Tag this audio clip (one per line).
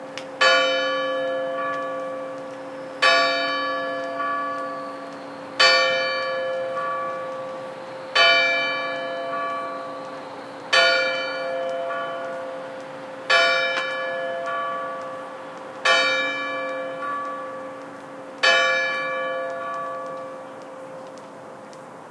church-bell
clock
church
strike
chimes
9